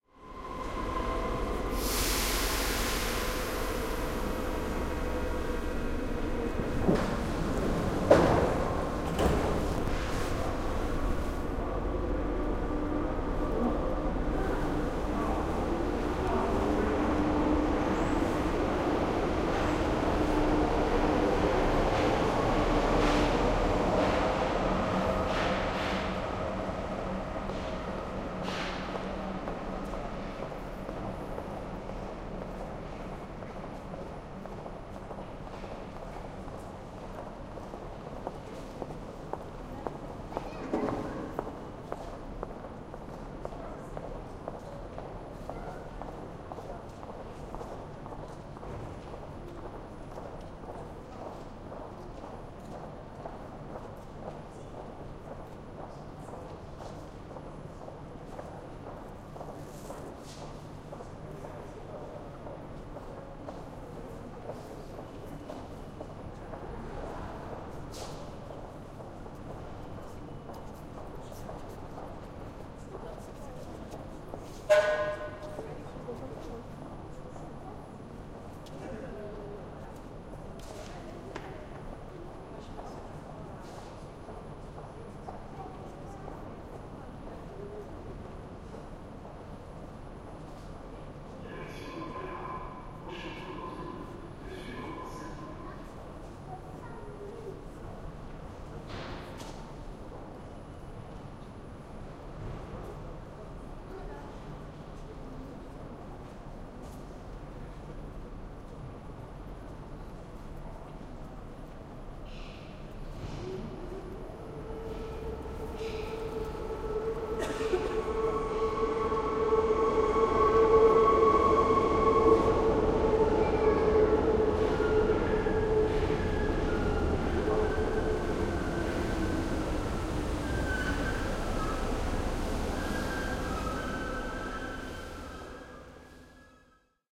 Paris Metro 002 Rear
This pack contains recordings that were taken as part of a large project. Part of this involved creating surround sound tracks for diffusion in large autidoria. There was originally no budget to purchase full 5.1 recording gear and, as a result, I improvised with a pair of Sony PCM D50 portable recorders. The recordings come as two stereo files, labelled "Front" and "Rear". They are (in theory) synchronised to one another. This recording was taken in the Paris Metro (Underground).